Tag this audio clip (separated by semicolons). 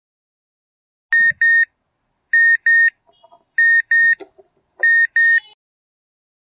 alarm; alert; mojo